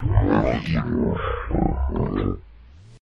demonic spell
snarl growl monster noise demon demonic scary eerie alien
alien, demon, demonic, eerie, growl, monster, noise, scary, snarl